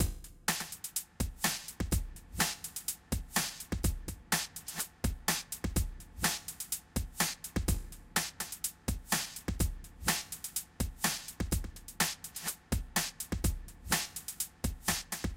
oldschool-glitchy1
Glitchy old-school beat
drums, beats, glitch, beat, oldschool